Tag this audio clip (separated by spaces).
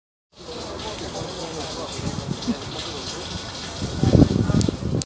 sewer Water